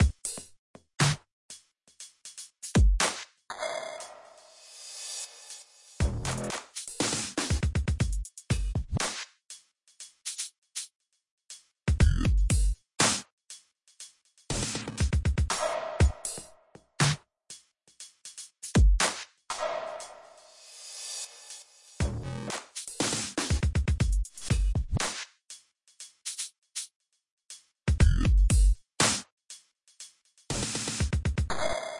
Heavy Beats1 120bpm
A beat from a song i made in Ableton using samples form Battery 4, and effects
120-BPM, beats, drum-loop, drums, Dubstep, hiphop, percussion-loop, quantized